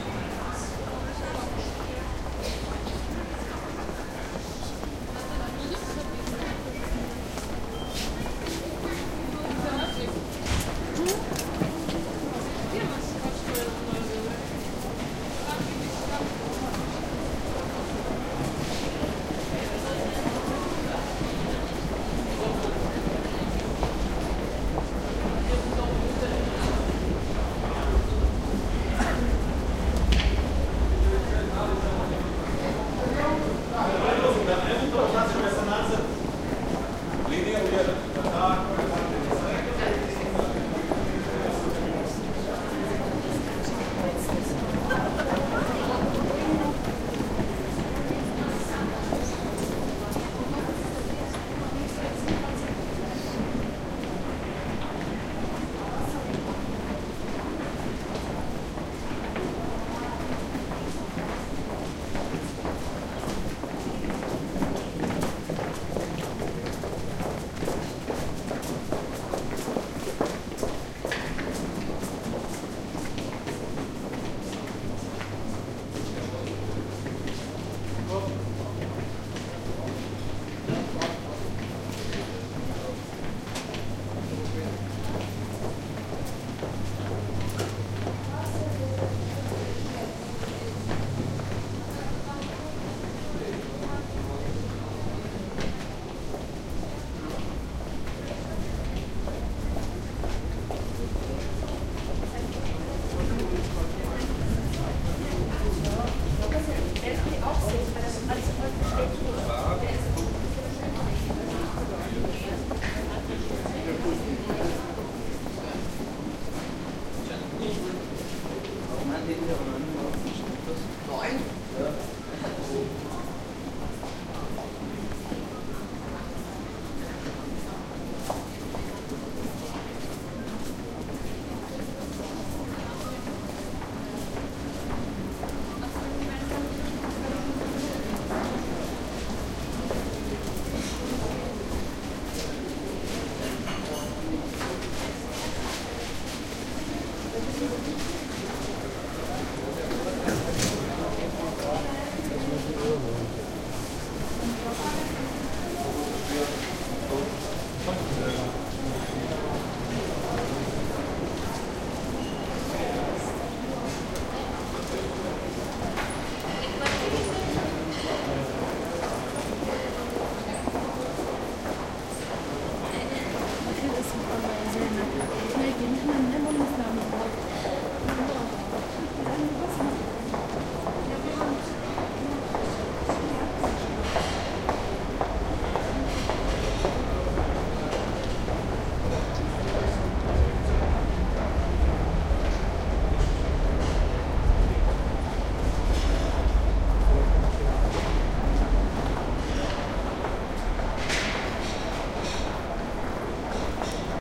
Karlsplatz 4b Passage
Recording from "Karlsplatz" in vienna.
field-recording, karlsplatz, people, underground, vienna